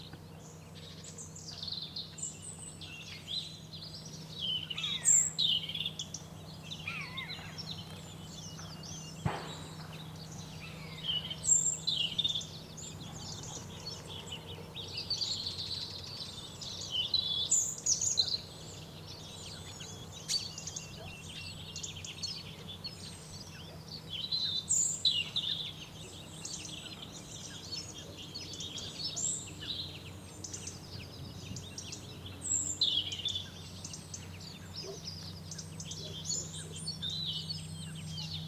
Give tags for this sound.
birds
nature
south-spain
ambiance
field-recording
forest
autumn

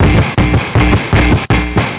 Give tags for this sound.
120bpm
buzz
loop

